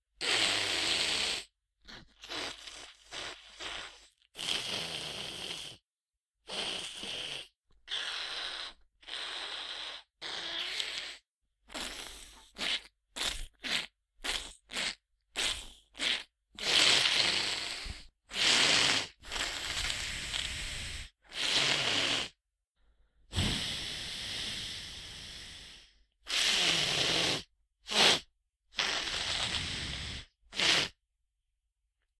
Stuffy nose
Nose, Sick, Stuffy